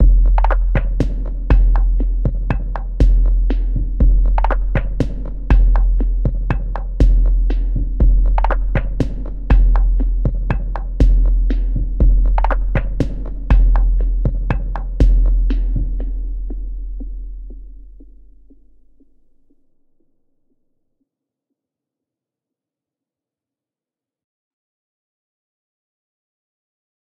3D Sound on 808
drums, 808, Sound, 3D